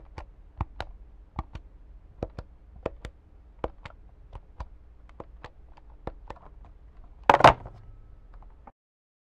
A telephone being dialed